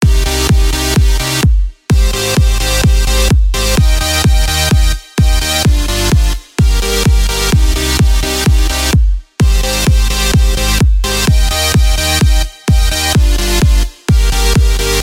melody loop mix 128 bpm dance created in fl studio.
Electro, Music, Bass, 128, House, bpm, mix, Kick, Loop, EDM, Drum, Dance, Melody